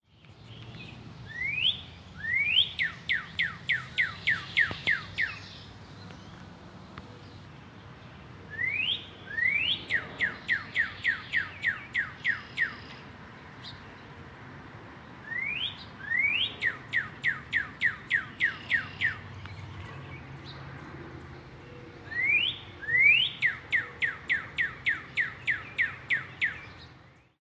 Whistling Bird backyard
Early afternoon field recording
field-recording, whistling, Bird